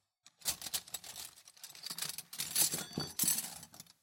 Moving cutlery.
{"fr":"Couverts 51","desc":"Bouger des couverts.","tags":"assiette couvert cuisine fourchette couteau cuillère"}
cutlery, fork, kitchen, knife, metal, rummaging, spoon, steel